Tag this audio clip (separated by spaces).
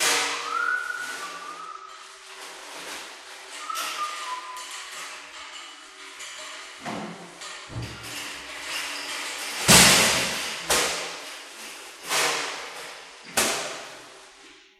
percussion bash hospital whistle scrape